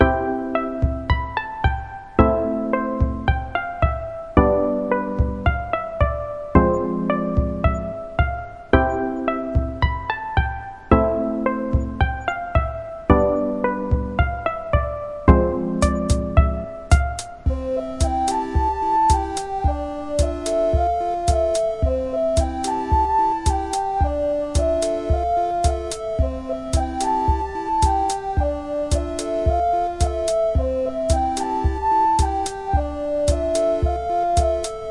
Light Tune ~ No.1
Use this for an Intro, background, or anything else
No real reason why a made this
Loop-able
background, happy, intro, lighthearted, tune, upbeat